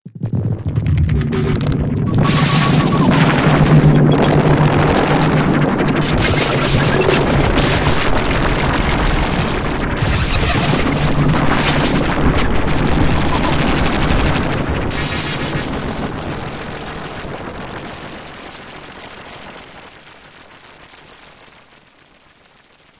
Glitch Tickle 7
23 second reverberated glitch sample with tail.
sound-design, ui, science-fiction, sound, sci-fi, technoise, glitch, noise, design, reverberation, reverberated